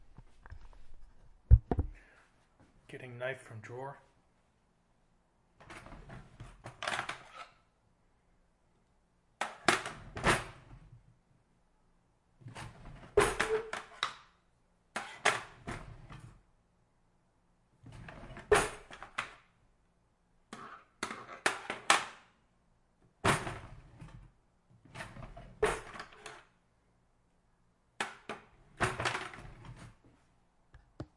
What It Is:
Shuffling an utensil drawer filled with knives, spoons, and forks.
Pulling a butter knife from a drawer.